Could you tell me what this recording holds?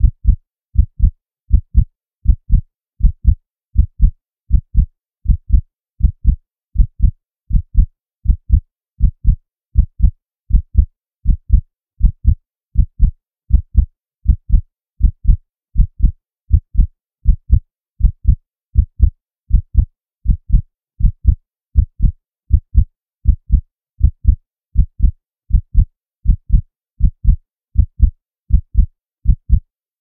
heartbeat-80bpm-limited
A synthesised heartbeat created using MATLAB. Limited using Ableton Live's in-built limiter with 7 dB of gain.
heart-beat, heart, heartbeat, body, human, synthesised